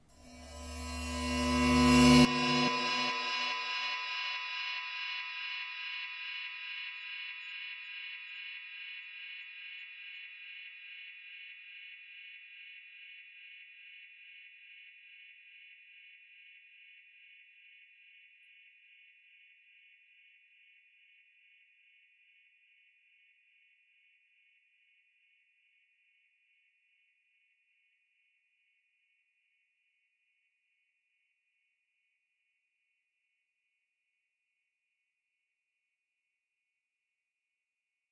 a resonant reverse cymbal sound i made with a small reverb and an 1\8 Note Dotted Dub Delay. At 107bpm